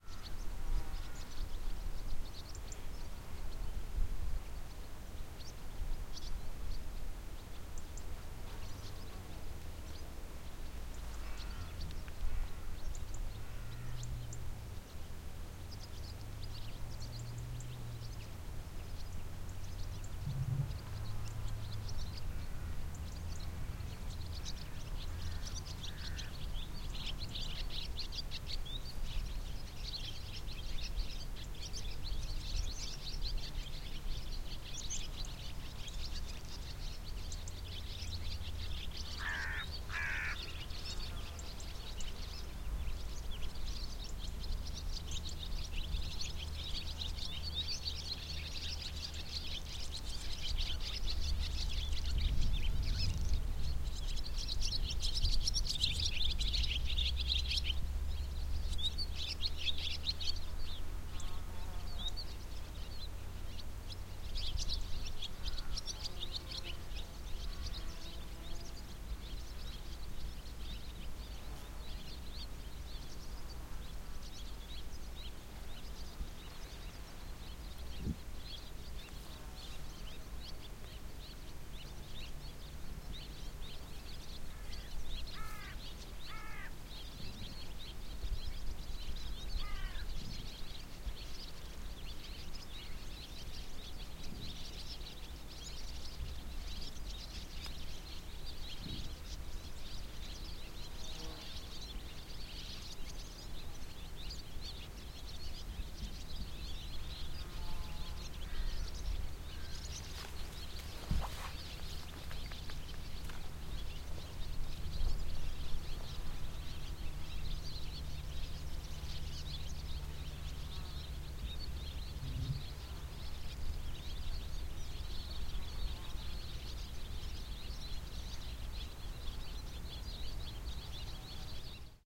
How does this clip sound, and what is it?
Ambi - Swallows in field, birds - Sony pcm d50 stereo Recording - 2010 08 Exmoor Forrest England
exmoor, Ambi, Swallows, england, side, stereo, birds, farm, forrest, country, field, ambiance